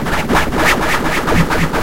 FLoWerS 130bpm Oddity Loop 008
This is where things get weird, high-resonance experiments. Only minor editing in Audacity (ie. normalize, remove noise, compress).